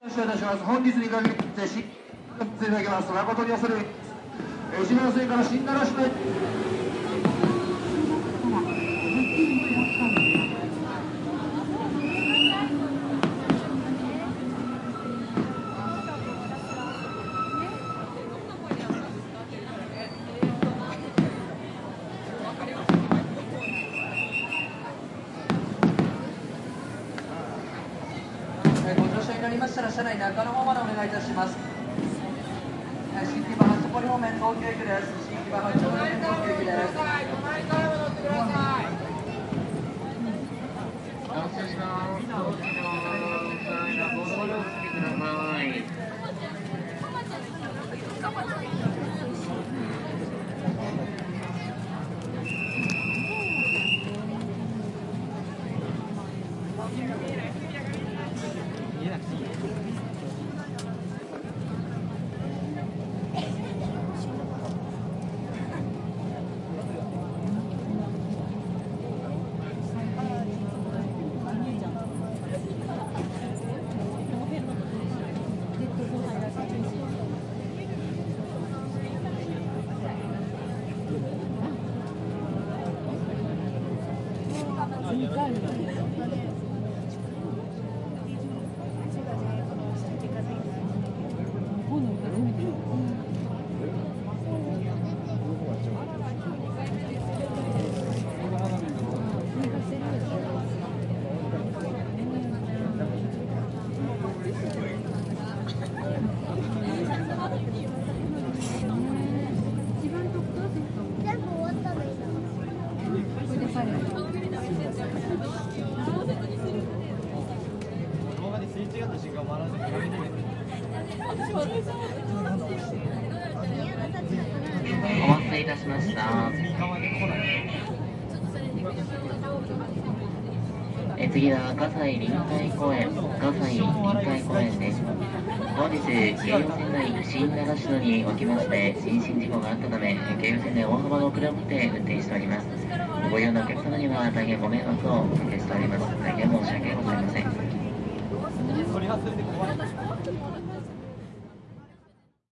Tokyo - Subway platform and train.
Male announcer on busy Tokyo platform. Onto crowded train, general atmos, murmured voices. Ends with another male announcer. Recorded in May 2008 using a Zoom H4. Unprocessed apart from a low frequency cut.